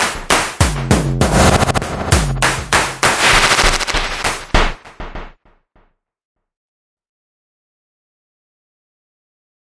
20140316 attackloop 120BPM 4 4 Analog 1 Kit ConstructionKit ElectronicPercussion26
This loop is an element form the mixdown sample proposals 20140316_attackloop_120BPM_4/4_Analog_1_Kit_ConstructionKit_mixdown1 and 20140316_attackloop_120BPM_4/4_Analog_1_Kit_ConstructionKit_mixdown2. It is an electronic percussion loop which was created with the Waldorf Attack VST Drum Synth. The kit used was Analog 1 Kit and the loop was created using Cubase 7.5. Various processing tools were used to create some variations as well as mastering using iZotope Ozone 5.
rhythmic, dance, electronic, 120BPM, ConstructionKit, electro, percussion, loop